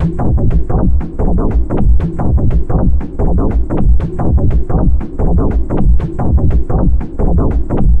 A Beat with filter and FX
effect, filter, fx, heart, heartbeat, human, loud, organic, strange, weird